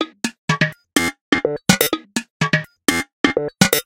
Abstract Percussion Loop made from field recorded found sounds